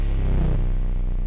Low Bass Slice
Slice of sound from one of my audio projects. A bass sound. Edited in Audacity.
Effect, Sample, Slice